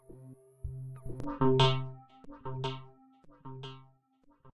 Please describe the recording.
Good day. This atmosphere, texture sound make by Synth1. Hope - you enjoy/helpful
effects, fx, gameaudio, gamesound, sfx, sound-design, sounddesign